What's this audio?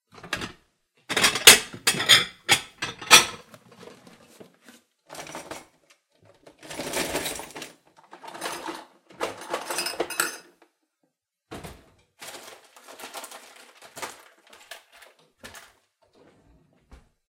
Rummaging and looking through cabinet doors in a kitchen.